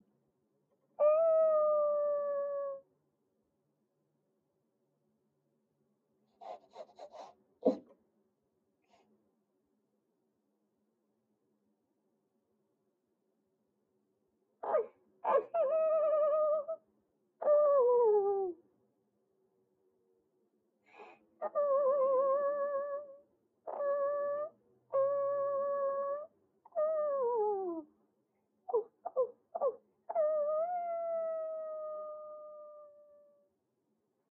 Cat speaking on an airplane.